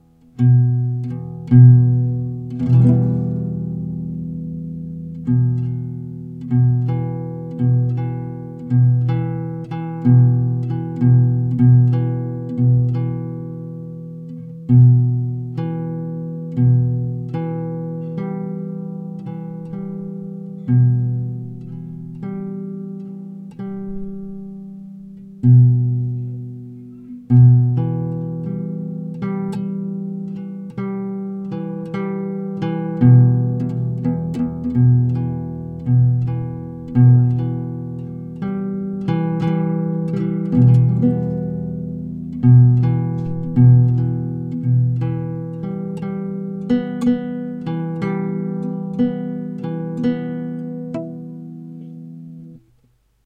guitar Em
guitar, improvisation, live-recording, many-sounds